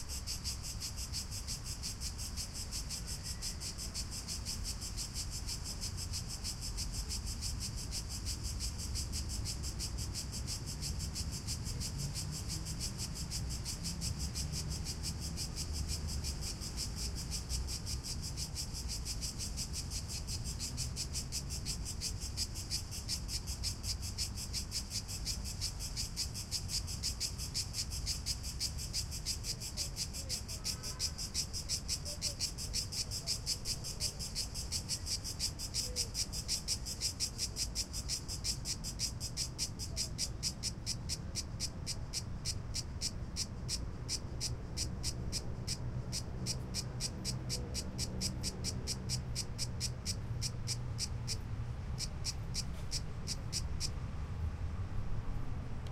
athens cicadas crickets
Some sort of crickets recorded in a tree in Athens.
cicadas, crickets, greece